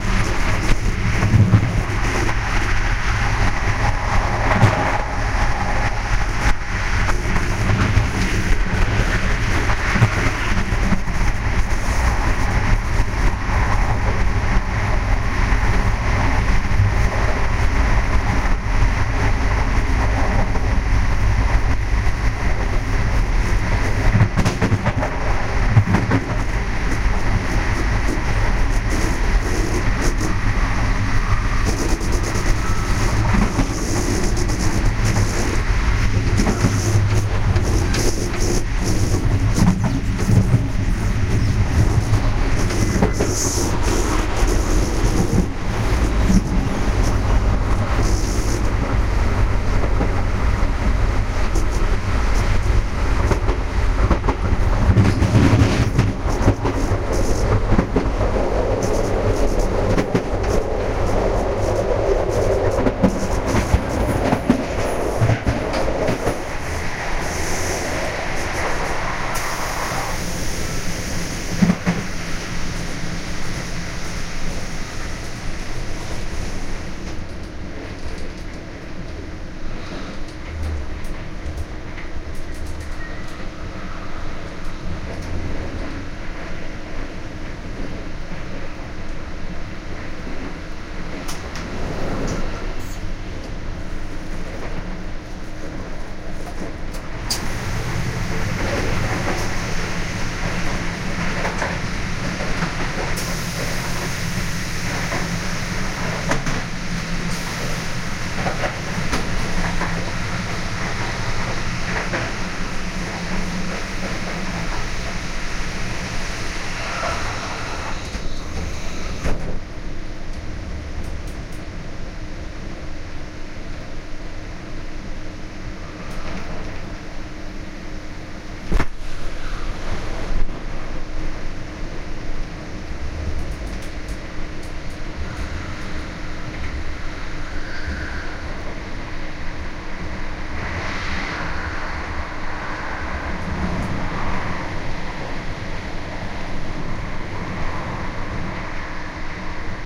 train lausanne geneva changing wagon binaural
between two wagon then inside the train.
binaural, close-up, field-recording, noise, outside, railway, train